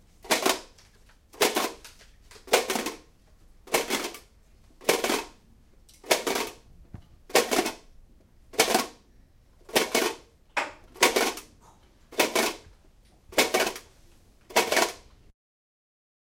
Porte ascenseur
Elevator door sound made sliding metallic boxes.
door, elevator, lift